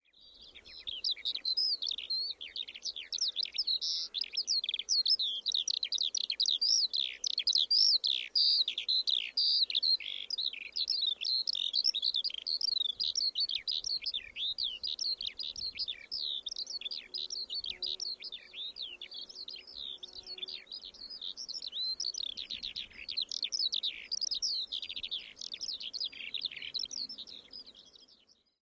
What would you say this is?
Eurasian Skylark (Alauda arvensis),song of the male in hovering flight in a height of some 100 m above a field north of Cologne, Germany. Vivanco EM35 on parabolic shield, preamp, into Marantz PMD 671.